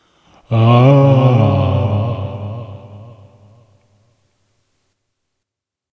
groan with echo